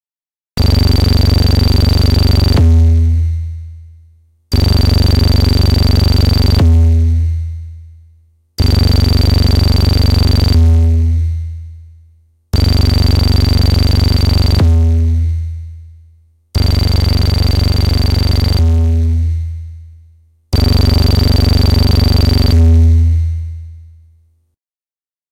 Made on a Waldorf Q rack
harsh, jackhammer, noise, synthesizer, waldorf